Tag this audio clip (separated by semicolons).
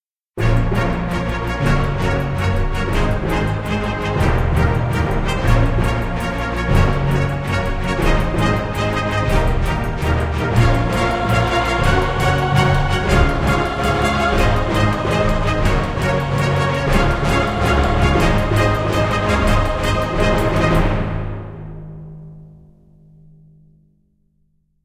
adventure; cinematic; dramatic; epic; film; intro; magic; magician; movie; music; orchestra; orchestral; ost; powerful; soundtrack; strings; trumpet; uplifting